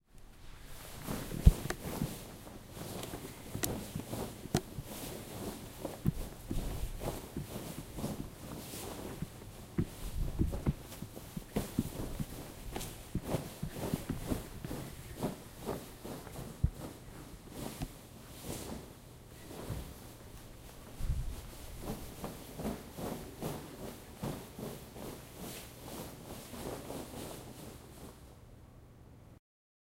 Dancing in a silk dress barefoot on concrete